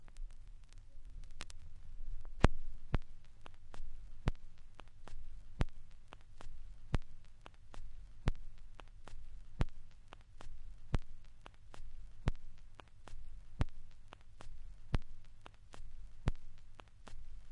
45 record end
The noise at the end of a 45 record.
analog, loop, noisy, record, vinyl